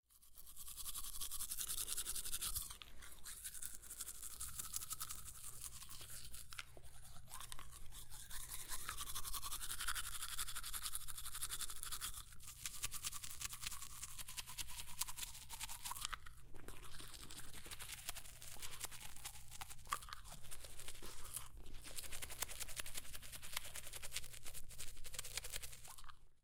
Miked at 3-4" distance.
Brushing teeth.